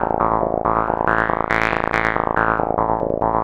JunoRiff-1 140bpm
synth loop in the key of A and 140bpm---------------------------------------------------------------------------------------------------------------------------------------------------------------------------------------------------------------------------------------------